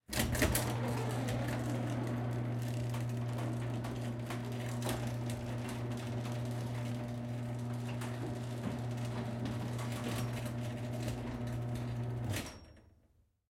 mechanical garage door opening, near miked, long creak, quad
Mechanical garage door opening. Long creak/squeal in the beginning. Left, right, Left surround, right surround channels. Recorded with Zoom H2n.
mechanical, opening